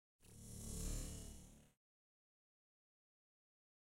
Transformers type of sound. Short version
metallic, electric, alive, Transformers, heat